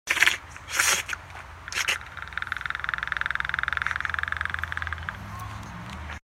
Mouth Noises
sucking, wet